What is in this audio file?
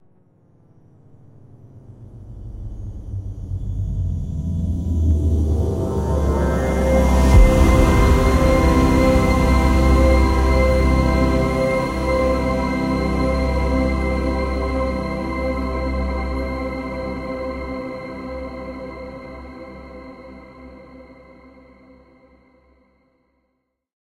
Epic & glorious riser/swell. Reminds me of movie theater intro sound lol.
Synth, Just Intonation, Windchimes
gates-of-heaven, glorious, godly, just-intonation, movie-theater, swell, synth, windchimes